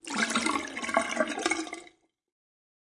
37 - 12 Water discharge
Water flows out of the sink